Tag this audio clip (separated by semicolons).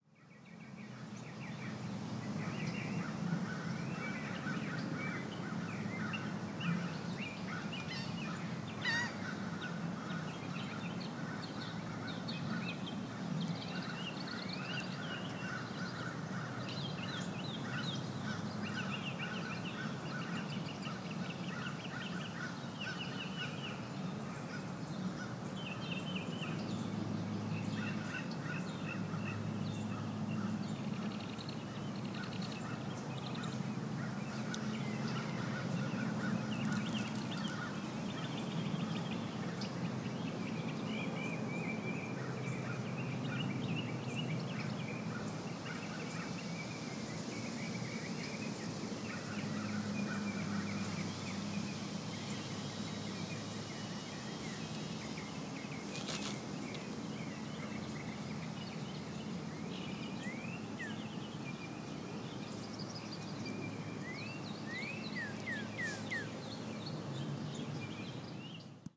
sound-design; Foley; field-recording; frontier; mic; dreamlike; dream; ambient; movement; rustle; nature; microphone